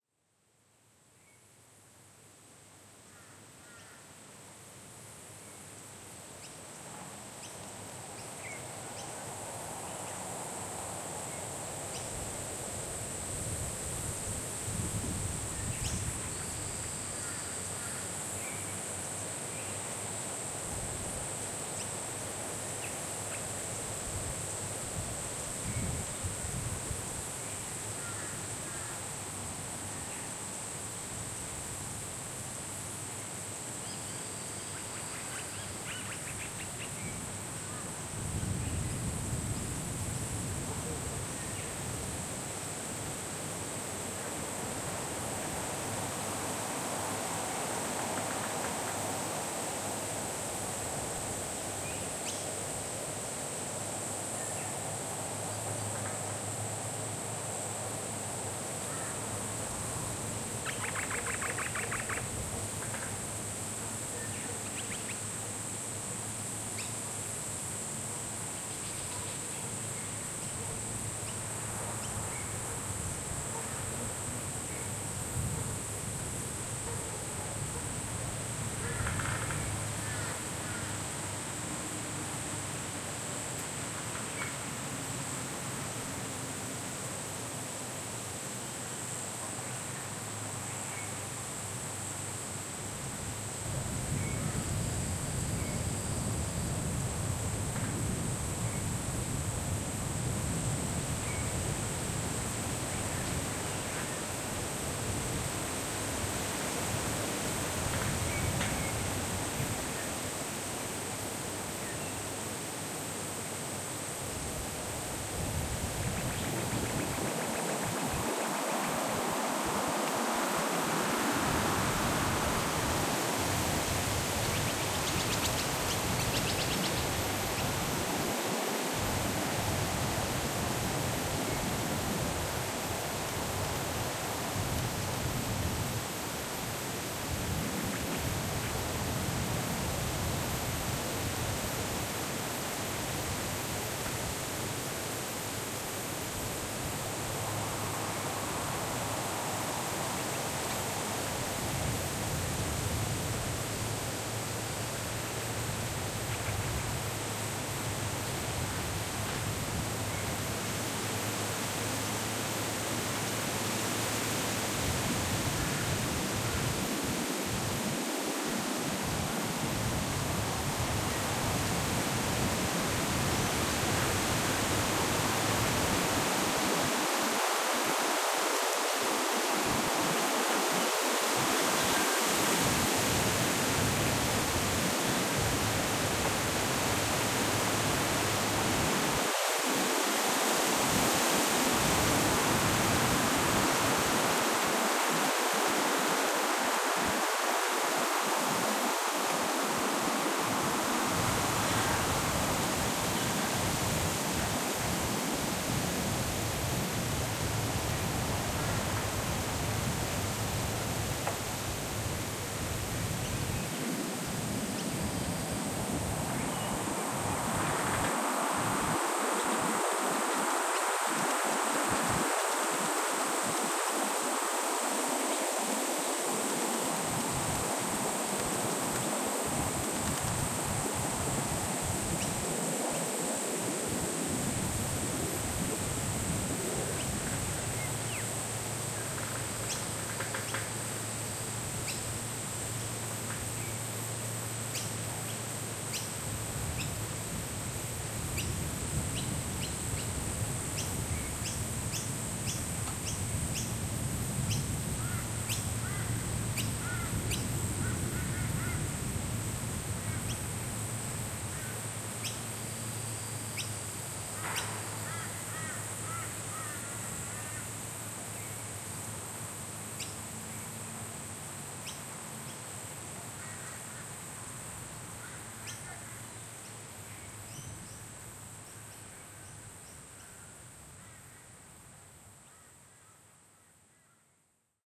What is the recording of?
Wind Through Trees
The sound of wind blowing through trees with birds chirping in the background.
Recorded using the Zoom H6 XY module.